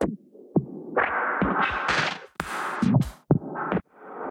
glicz 0001 1-Audio-Bunt 5
bunt, NoizDumpster, synthesized, noise, tracker, breakcore, digital, harsh, electronic, drill, glitch, rekombinacje, lesson, synth-percussion, lo-fi, square-wave